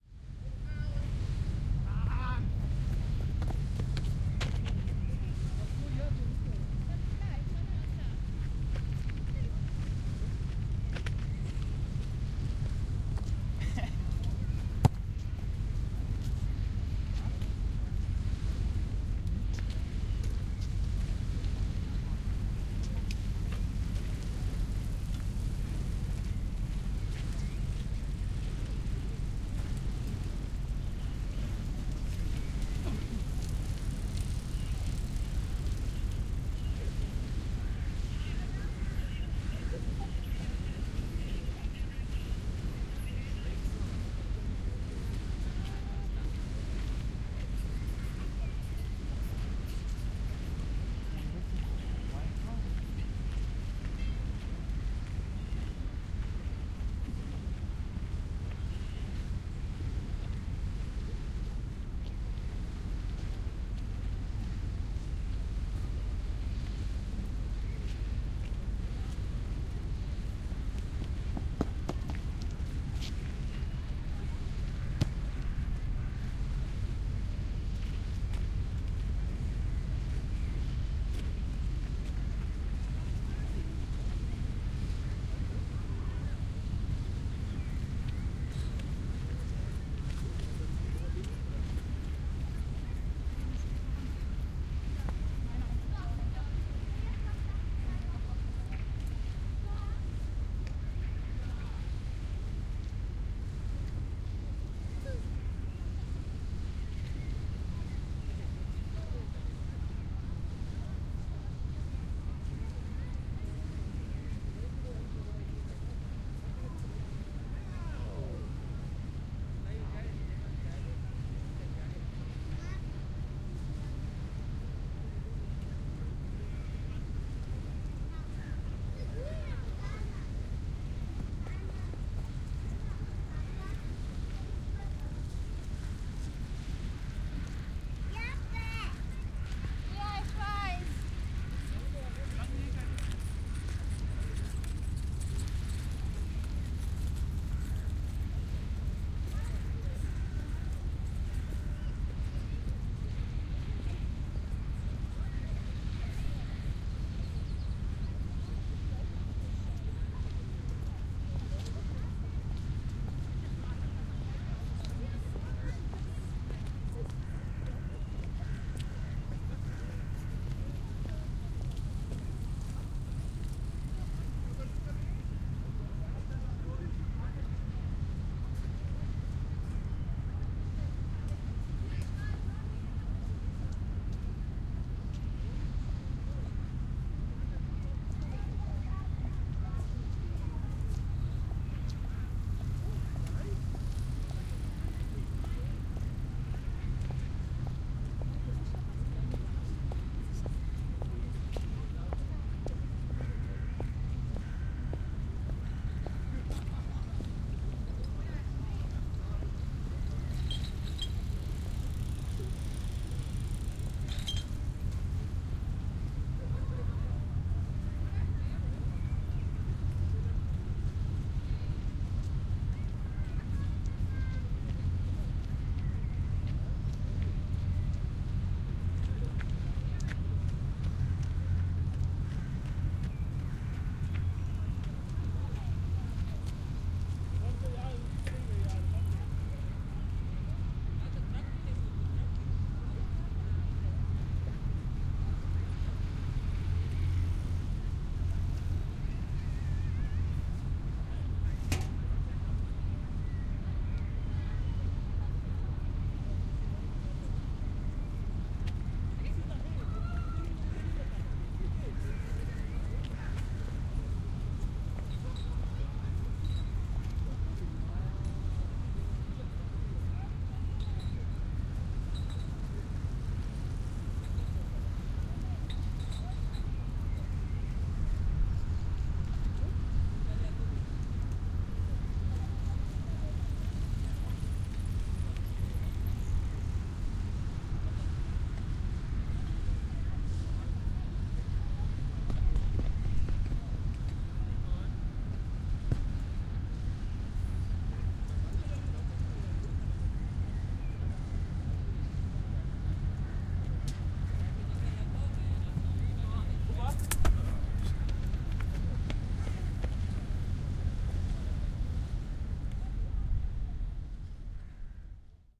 wayside at harbor
brisk wayside in Hamburg at the Elbe. Kids playing soccer
Wegesrand in Hamburg, Övelgönne, fußballspielende Kinder
harbor, soundscape, voice